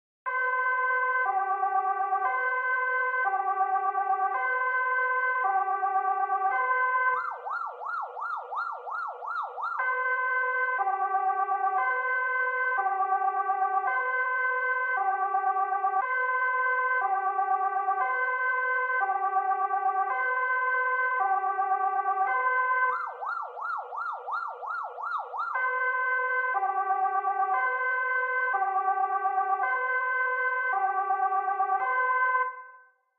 Swedish police siren. Created with operator in ableton live.
ambulance, cop, emergency, european, police, siren, swedish